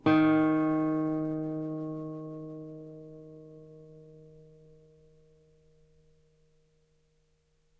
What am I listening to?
D#, on a nylon strung guitar. belongs to samplepack "Notes on nylon guitar".